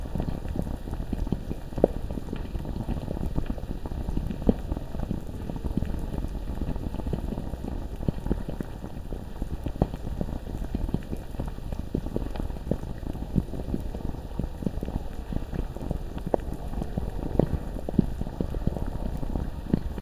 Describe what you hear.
Lava Loop 1
A slowed down and heavily edited recording of a chemical boiling I recorded in my chemistry class. Sounds like a medium-sized volume of lava. Loops perfectly.
Recorded with a Zoom H4n Pro on 08/05/2019.
Edited in Audacity
heat, fire, subterranean, bubbling, boil, flame, hot, volcano, fireball, burn, magma, lava